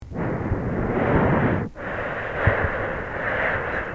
Person breathing out then in. Recorded with stereo microphone, removed as much background noise that I could.